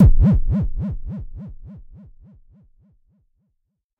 Tonic Kick with tail delay
This is a Kick with tail delay sample. It was created using the electronic VST instrument Micro Tonic from Sonic Charge. Ideal for constructing electronic drumloops...
electronic, drum